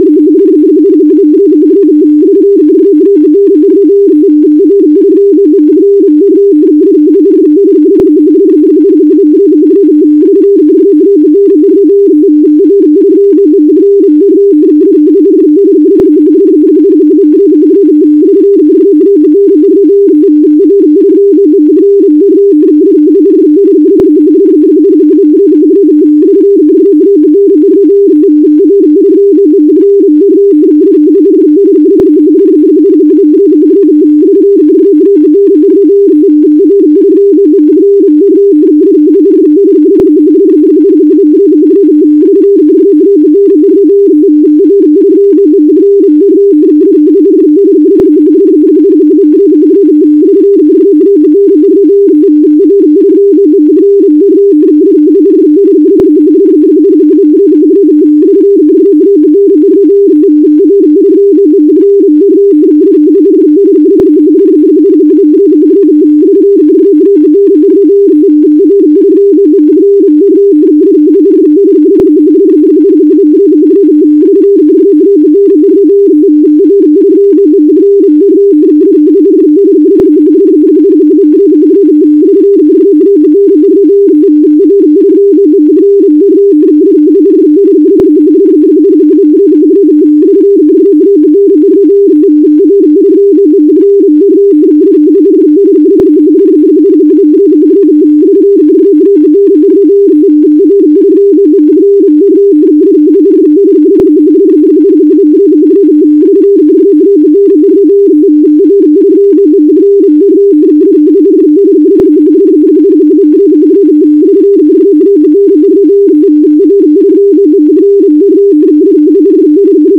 This sound is like a serial transmission of a message. I won't say what it is, but the message is easy to decode, because if people knew what it says they would want to share it with their friends anyway. Not that I expect everyone who hears it to try to decode it. I can make other variations of this, just send me a message.
decode, feeling, message, serial, serialtrans, sound, thought, transmission, universal